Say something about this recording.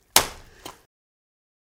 Meat Slap 1
Meat Slap Guts Fall
fall, guts, meat, slap